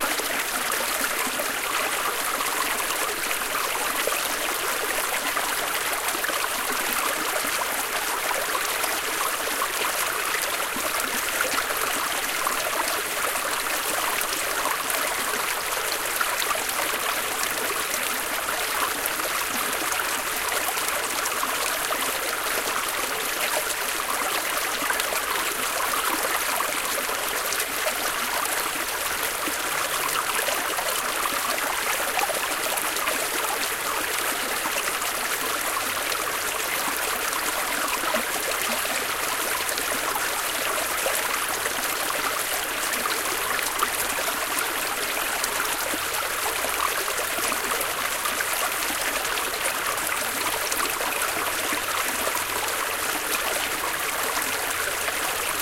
Medium stream flowing through rocks along the Watershed Trail in Nolde Forest, Mohnton, PA.
Recorded with a Tascam PR-10.